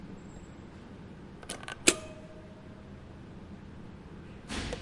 Button Press 1
A recording of an elevator button press at night.
button, elevator, field-recording, night, press